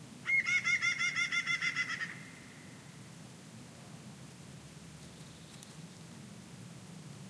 20100207.forest.cry.00
ambiance, birds, donana, field-recording, forest, nature, south-spain, winter